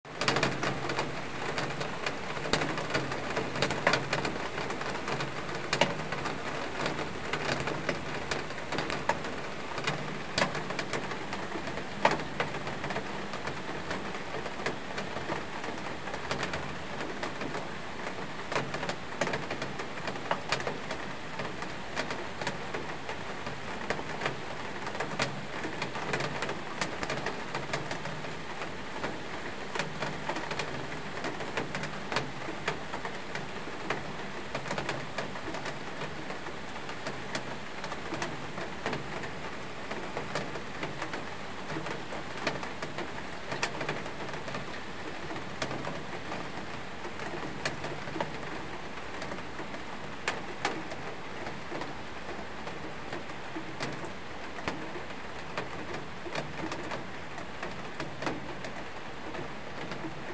Heavy Rain
A recording of a heavy tain at night in Tyumen at 17.06.2022, 2:03 AM
Ypu can also hear water drops.
Drops, Nature, Rain, Splash, Water, Window